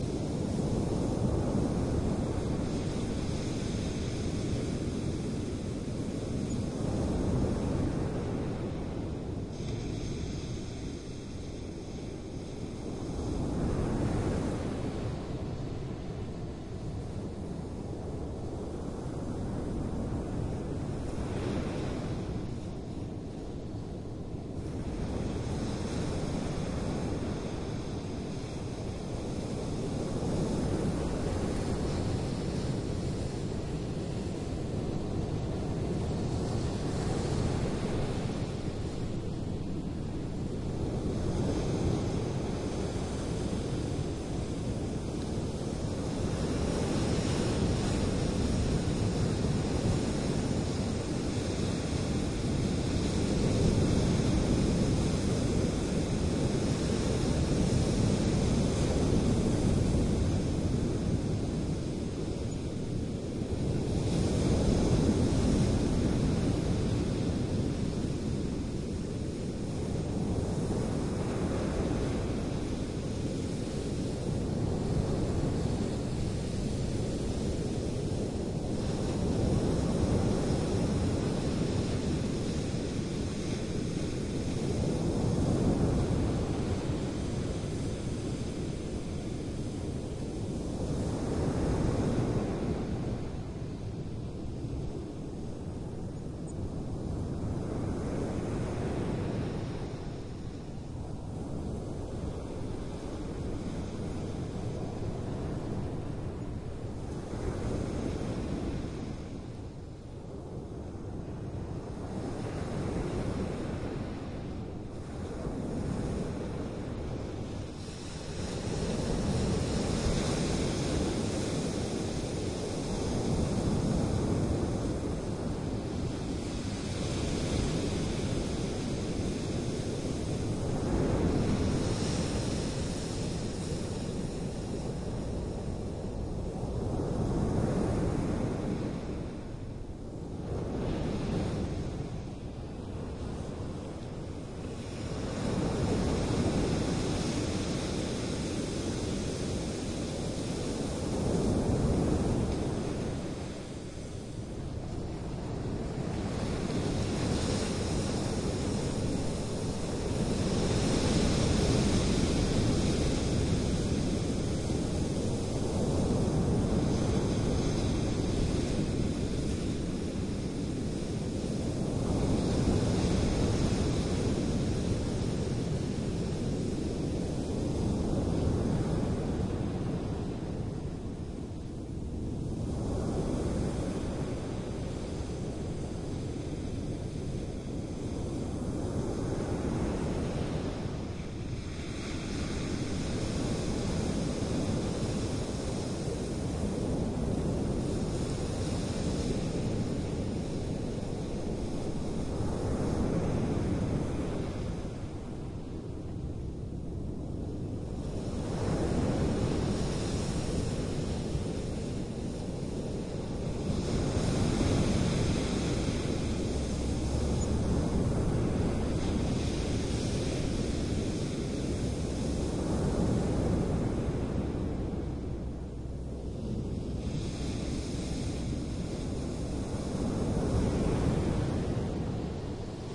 Waves, big. 8m distance on our back
Big waves at 8m distance (microphone 180 degrees off axes)
Sea, Beach, Greece, Waves